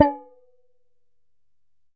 hit - peanut can 06
Striking an empty can of peanuts.
metallic, empty, thunk, canister, container, smacked, banged, hit, whacked, can, knock, struck, thunked, collision, knocked, crashed, thump, whack, bang, impact, collided, thunking, smack, crash